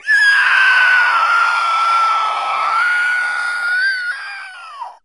Male Inhale scream 6
A dry recording of male screaming while breathing in.
Recorded with Zoom H4n
alien, animal, creature, cry, human, inhale, male, monster, schrill, screak, scream, screech, shriek, squall, squeal, yell